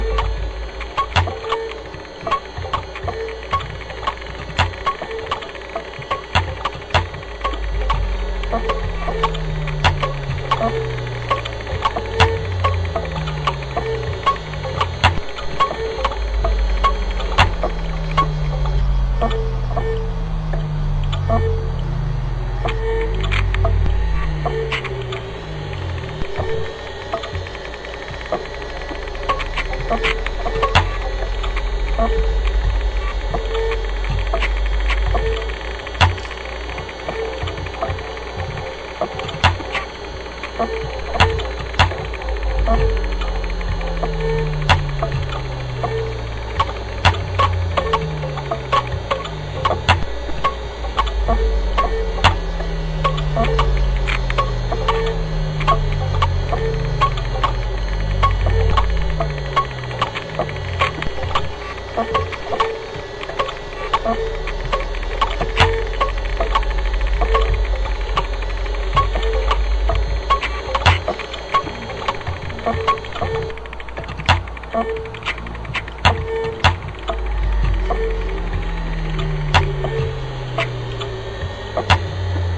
0 (Hard Drives Found Failing)
fails and flais of relics in data
failure, dead, void